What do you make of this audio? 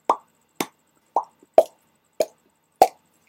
Popping noises
Various human made popping sounds.